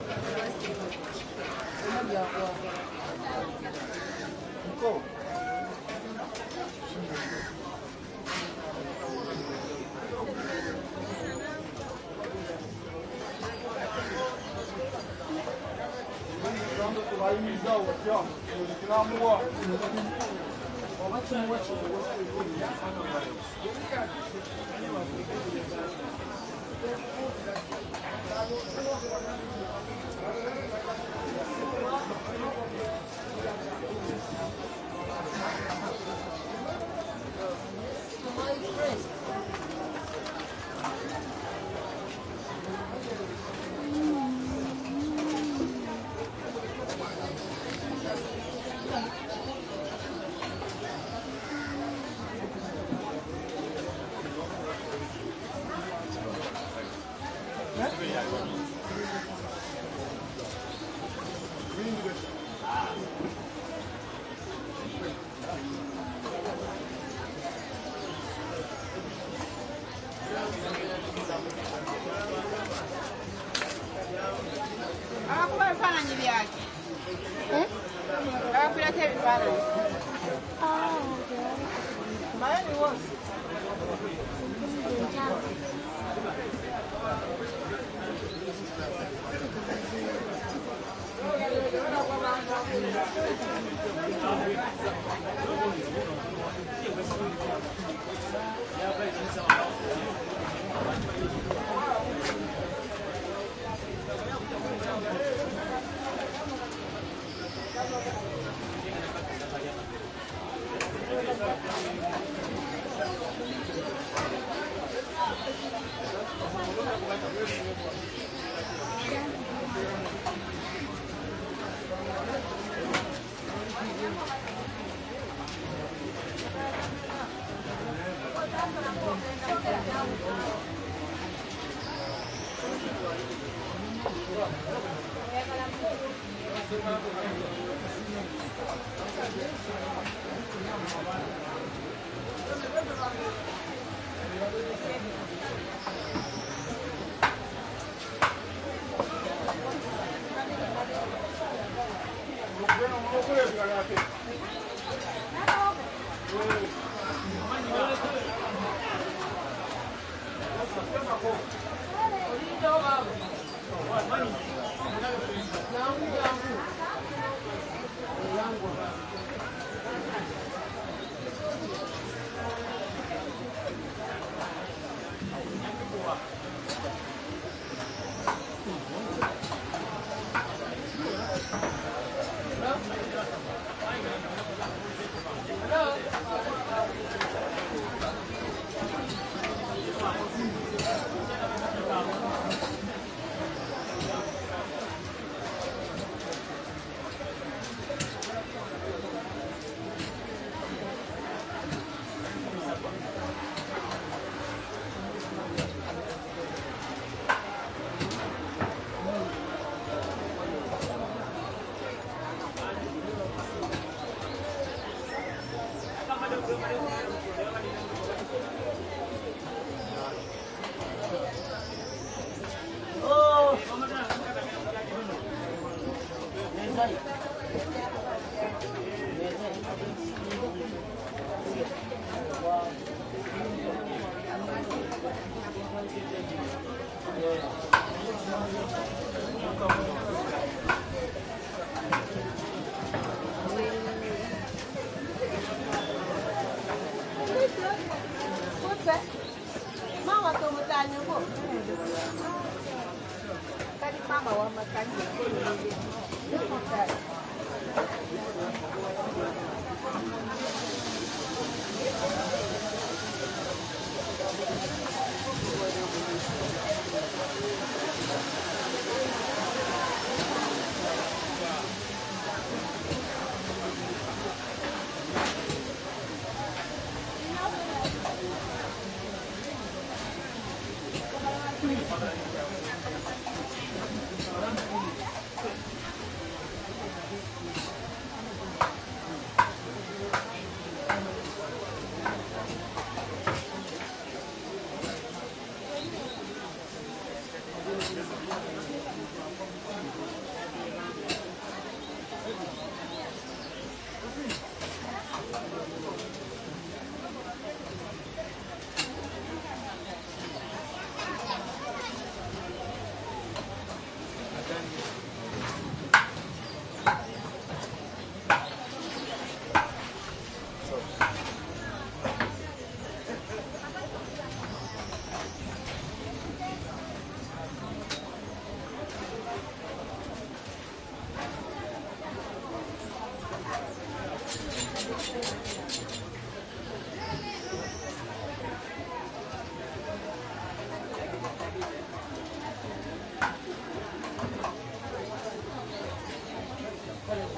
market int ext under umbrellas produce and fish active voices activity cooking chopping fish sometimes +faint music right Entebbe, Uganda, Africa 2016
active, Africa, fish, int, market, Uganda, umbrellas, under